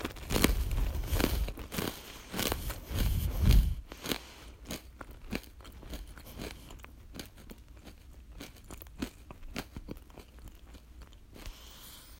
Me eating a pretzel